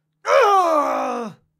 Warrior Death Cry - British Male
A British military character groaning his last breath.
Perfect for an evil warrior, a hardened fighter, or a grizzled knight.